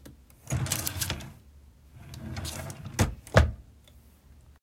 Wooden drawer; openshut
Opening and closing a wooden desk drawer
open, drawer, wooden-drawer, close